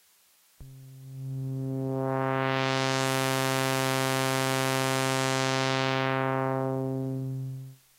C-1 Long Atack and Release
C-1 recorded with a Korg Monotron for a unique synth sound.
Recorded through a Yamaha MG124cx to an Mbox.
Ableton Live